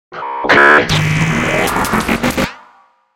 Gave myself a wee sound challenge tonight and knocked up some transformer noises.